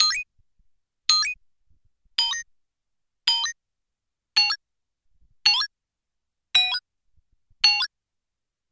8bit110bpm-16
The 8 Bit Gamer collection is a fun chip tune like collection of comptuer generated sound organized into loops
loop, 8bit